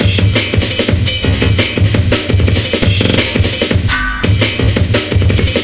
rotor pt2
sample "rotor pt1" with effect gross beat (vst) in fl studio
adobe audition for reverb effect
snare, breakbeat, drums, drumbeat, bass, breakbeats